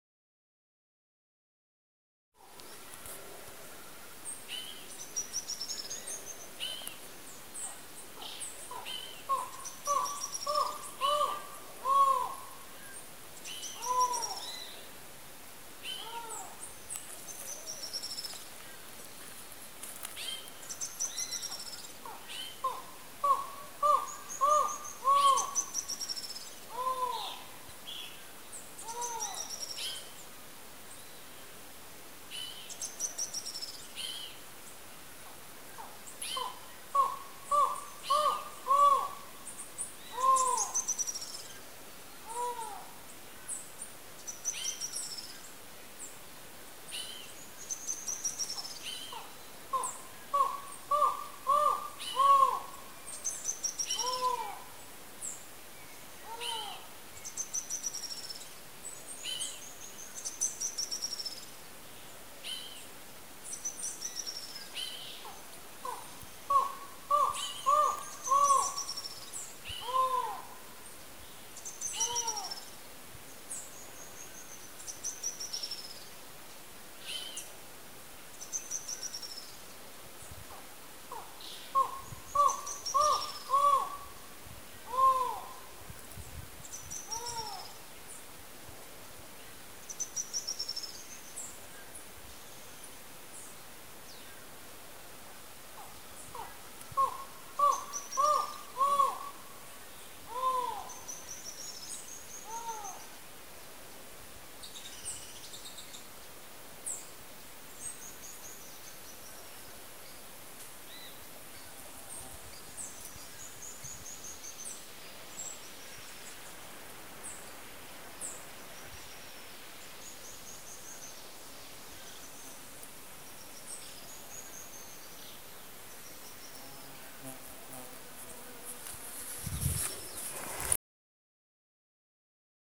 Macaco no nascer do sol em Campos do Jordão - TCC Adentro
Captado durante as gravações do TCC "Adentro" pela aluna Bianca Martini. Gravado com um celular modelo Samsung Galaxy J1
"Na segunda diária do meu tcc eu acordei mais cedo por que estava afim de ver o nascer do sol. E ai perto das cinco da manhã comecei a ouvir uns sons de macacos bem próximos da casa aonde estávamos ficando e decidi ir la ver. Quando cheguei vi um macaco bem em cima de uma arvore, ele emitia um som forte muito bonito, mas de arrepiar. Infelizmente estava sem o gravador, mas para não perder decidi aproveitar o gravador do celular mesmo!"
ape, senac, monkey, natureza